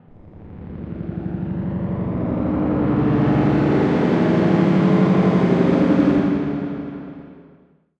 SteamPipe 1 Mechanical G#1
This sample is part of the "SteamPipe Multisample 1 Mechanical" sample
pack. It is a multisample to import into your favourite samples. The
sample is a sound that in the lower frequencies could be coming from
some kind of a machine. In the higher frequencies, the sound deviates
more and more from the industrial character and becomes thinner. In the
sample pack there are 16 samples evenly spread across 5 octaves (C1
till C6). The note in the sample name (C, E or G#) does not indicate
the pitch of the sound but the key on my keyboard. The sound was
created with the SteamPipe V3 ensemble from the user library of Reaktor. After that normalising and fades were applied within Cubase SX & Wavelab.
industrial, multisample, ambient